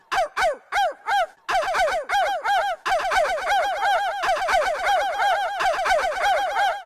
Hillary Bark
Hillary Clinton barking
Clinton, Hillary, canine, barking